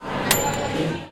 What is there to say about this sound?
Microwave ding
Finalization sound of a microwave inside a bar
microwave, bar, ding, campus-upf, UPF-CS12, finalization